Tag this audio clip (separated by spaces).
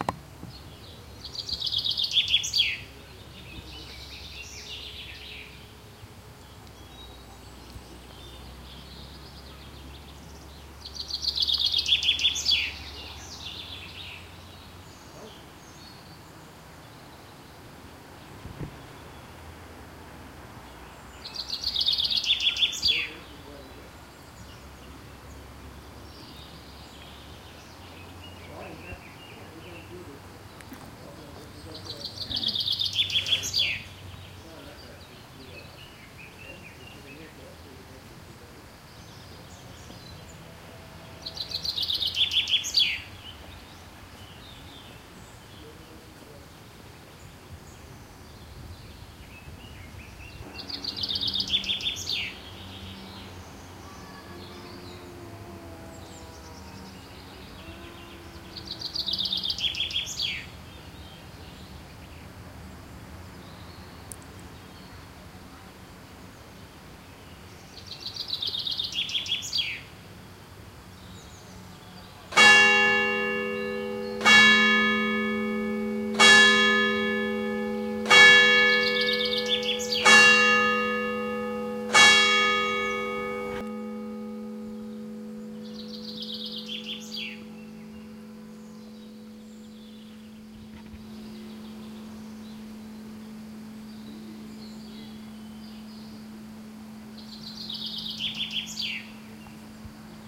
singing birds mountain chirping church-bells